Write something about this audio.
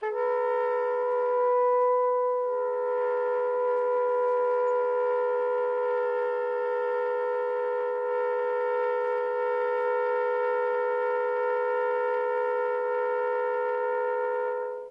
The number of file correspond to the numbering of the book:
Le sons multiples aux saxophones / Daniel Kientzy. - Paris : Editions Salabert,
[198?]. - (Salabert Enseignement : Nuovelles techniques instrumentales).
Setup: